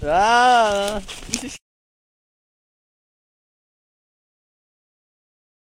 Recorded at a protest to save Anvil Hill, on the way to write out human sign that says "save Anvil Hill" - unfortunately the plans to build more mines has gone ahead.